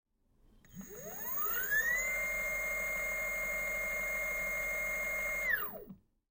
Electric skateboard
machine, skateboard